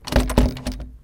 jp lockedknob08
Pulling and twisting on a locked metal doorknob.
handle, locked, shutter, shuttering